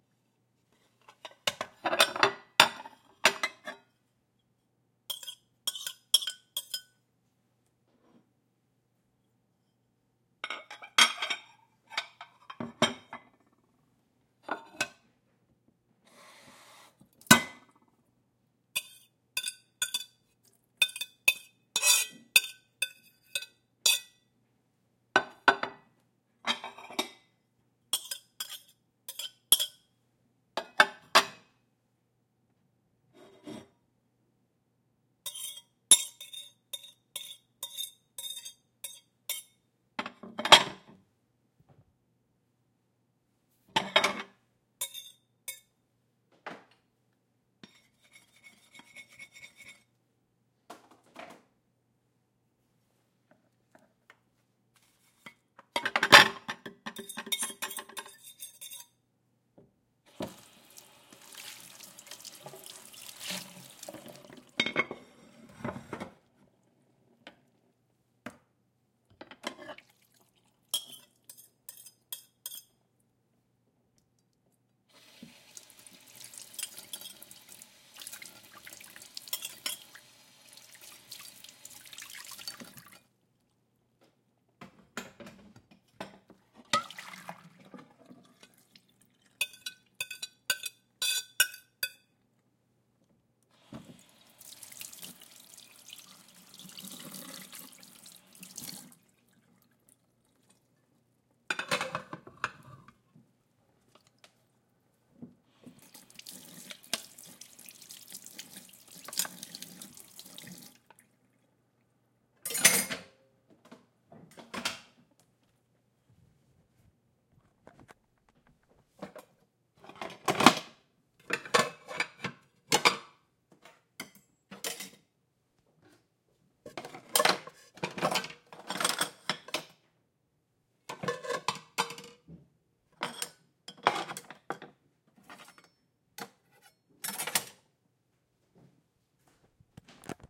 Kitchen.PlatesSraping.Faucet
Me fussing in my friend's kitchen. Plates being scraped, stacked and clinking at close proximity. Eventually water running and rinsing. Recorded on a Zoom h4.
Kitchen, Rinsing, Scraping-Plates, Stacking-plates, Washing-Dishes, Water-Running